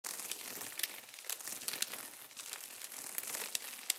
Butterfly flying loop
animal, butterfly, flying, foley, loop